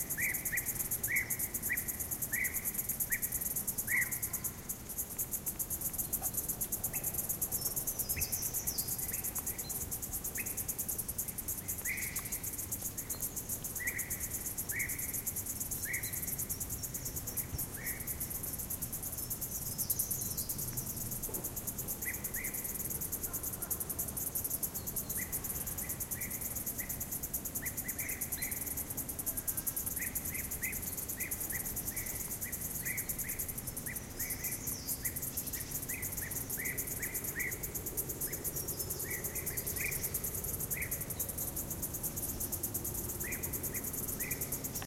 peaceful ambiance of pine forest in summer, with cycadas and , birds /ambiente de verano en pinar, con chicharras y pajaros